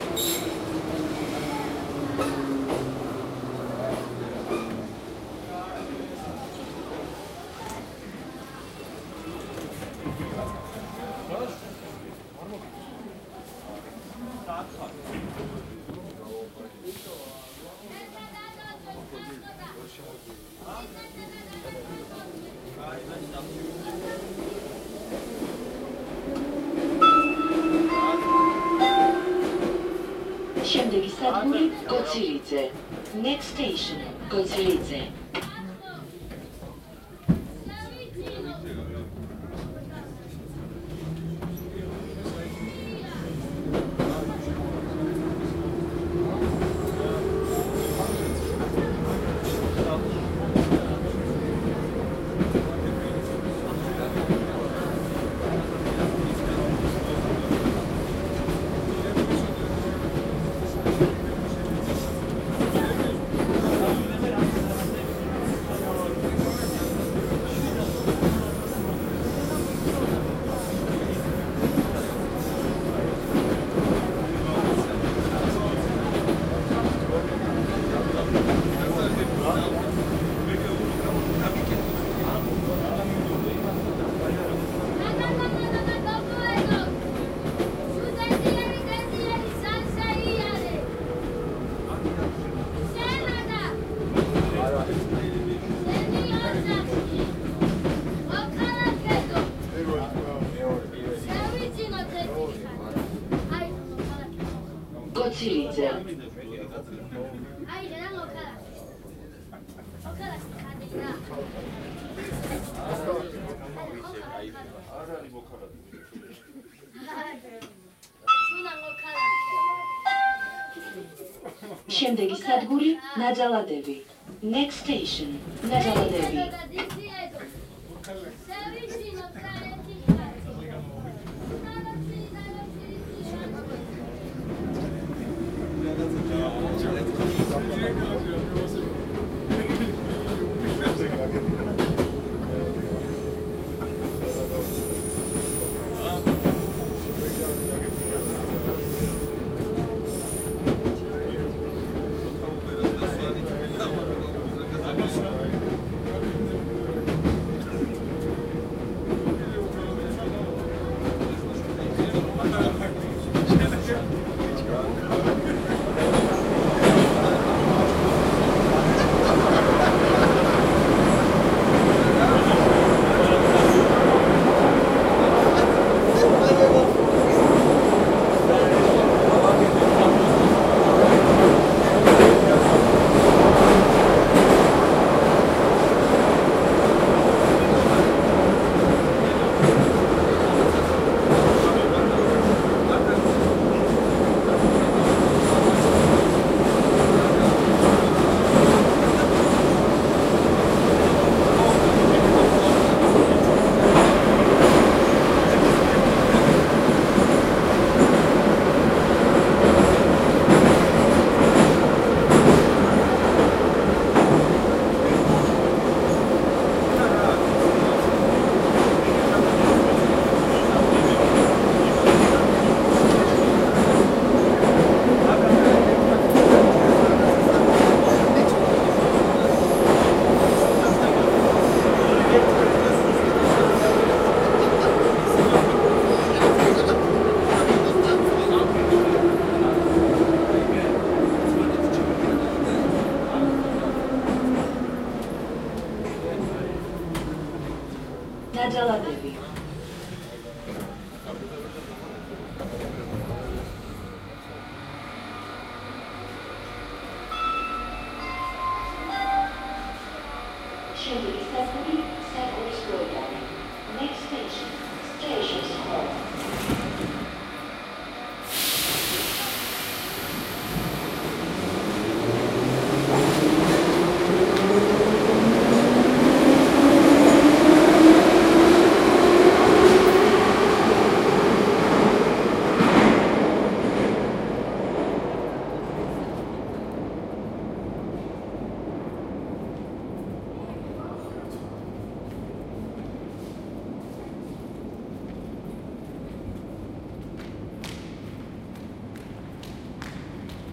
Used H2N to record Tbilisi metro from Didube station to Nadzaladebi station.